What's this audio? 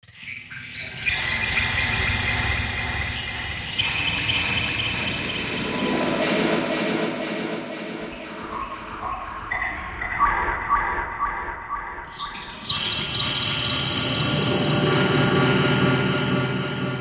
lost jungle
dark texture pad sounds of the jungle with a dark feel
ambient, dark, forest, jungle, pad, textures